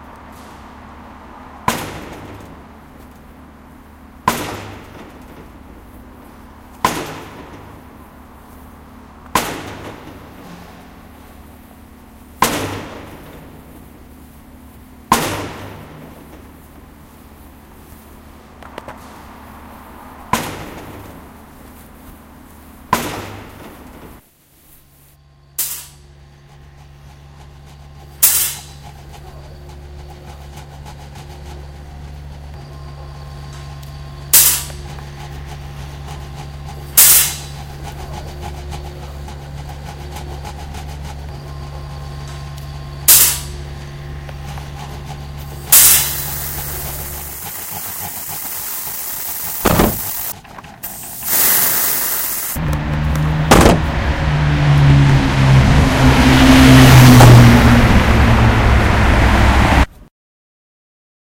SonicPostcard WB Fatma
Here's the SonicPostcard from Fatma, all sounds recorded and composition made by Celine from Wispelbergschool Ghent Fatma